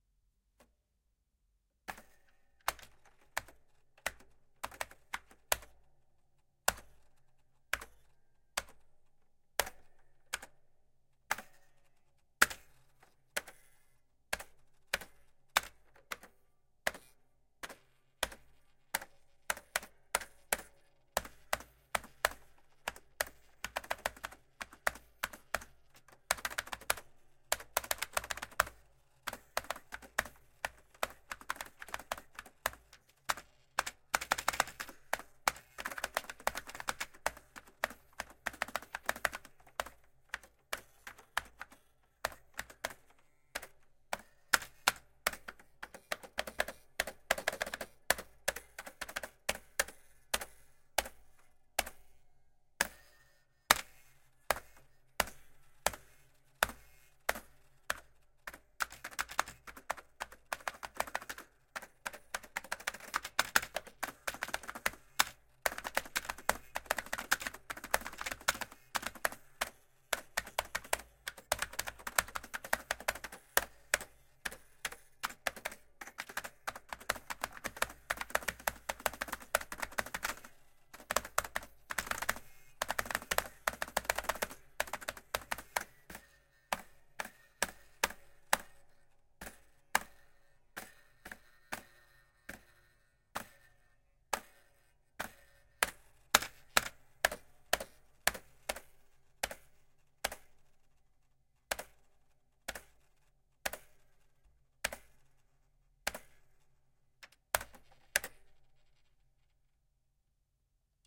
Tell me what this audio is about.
210915 0036 retrokeys OMNI ОрельБК-08 ZX
Vintage keyboard "Орель БК-08" (ZXspectrum replica). Recorder - DR100mk3. Mic - Lom Usi Pro (Pair)
button, click, clicking, clicks, computer, key, keyboard, keys, keystroke, laptop, office, press, pushing, radioshack, tapping, touchpad, type, typewriter, typing, UI, vintage